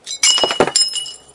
Breaking Glass 19
Includes some background noise of wind. Recorded with a black Sony IC voice recorder.
glasses, break, crack, glass, shards, tinkle, pottery, splintering, breaking, shatter, smash, crash